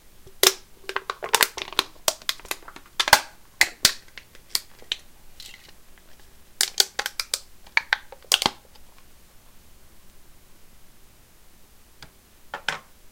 soda can crushing with hands
Me crushing a 7-up can by squeezing in the sides and then squeezing the top and bottom together. File ends when I put the can down on my computer-desk. Recorded with cheap 12-year-old Radio Shack mic.
crushing, smash, pop-can, soda-can, crumple, bare-hands, aluminum, soda, crunch